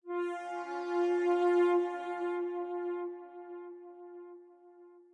PsyF3horns
Imitation of a breathy horn.
psy, breathy, horn, creepy, spooky, horror, ambient